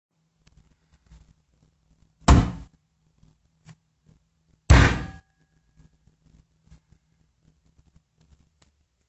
Golpe mesa
Grabación de golpe en una mesa simulando una discusión, para usarlo como FX (efecto de sonido) en la radionovela "Asignaturas Pendientes" para RadioUA de la Universidad de Alicante. (España)
Recording of a table shot simulating a discussion, to use it as FX (sound effect) in the radio soap opera "Asignaturas Pendientes" for RadioUA of the University of Alicante. (Spain)
discusion, hit-table, table